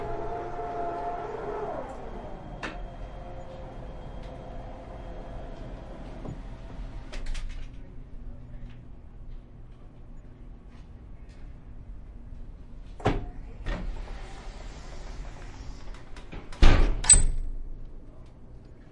handicapped door open and close
shut, open, door, electric, metal, close